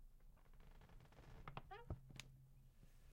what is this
Sitting down in chair
Sitting down in a faux-leather chair.